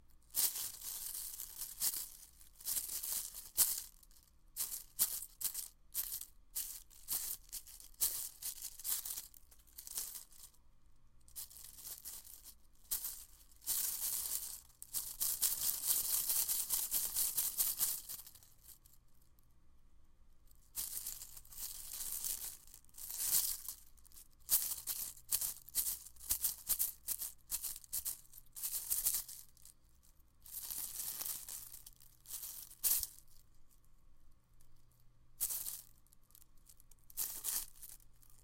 small person animal(s) in leaves
various speeds of something small stepping on leaves- stepping, stopping, running, jumping etc
crunched two dead leaves in my fingers 6 inches from a Blue Snowball Microphone.
a little bit of room tone but fine if lowered for texture.
small-animal-rummaging, light-footsteps, crunching-leaves